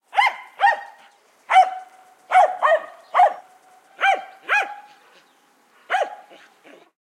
Recording of dog barking in the distance